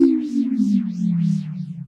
Power Down
Powering down oscillation
down, laser, motor, electric, machine, power, space, engine